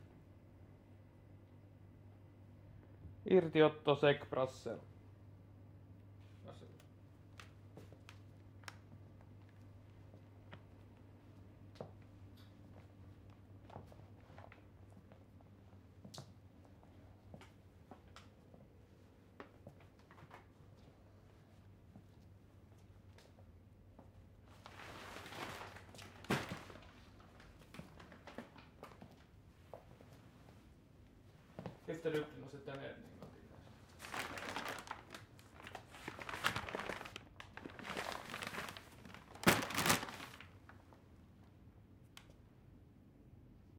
THE RATT14 1
Made during the filming of a short movie, so excuse the commandos given in the beginning of the sample. Using a MKH60 and a SoundDevices 744T HD recorder.
potatosack, paperbag